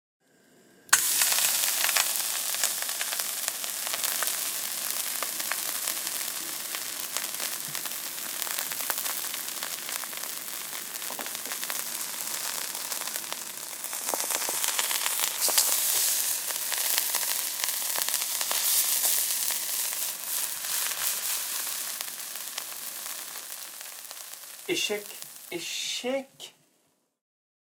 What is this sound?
Sizzle Milk burning in a pan
boil, cook, fry, frying, gas, hot, milk, oil, pot, sizzle, steam